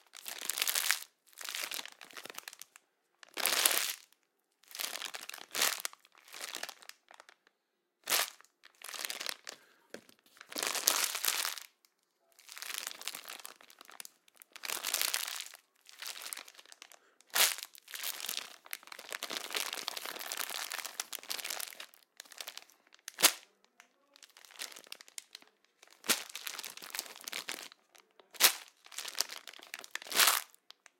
Bag of Chips
bag, Crunch, fastener, plastic, zip, Zipper